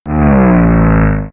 Spaceship Flyby 1
The queer spacecraft soars through deep space, its engines rumbling. If this describes your sound needs you've found the perfect sound! Could also pass as a ambient effect. Made by paulstreching my voice in Audacity. I always appreciate seeing what you make with my stuff, so be sure do drop me a link! Make sure to comment or rate if you found this sound helpful!
science, outer-space, starship, fi, flyby, spaceship, aliens, engines, engine, alien, sci, futuristic, ufo